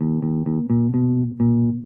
recording by me for sound example to my student.
certainly not the best sample, but for training, it is quiet good. If this one is not exactly what you want listen an other.